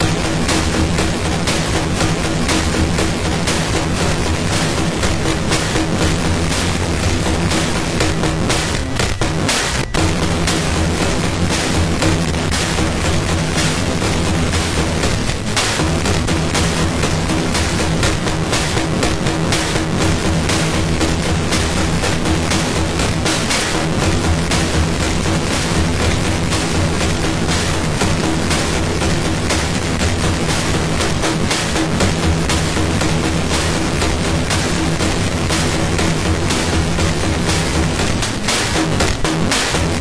Dr.Ruiner Slow Rhythm
sample of circuit bent Roland DR-550 drum machine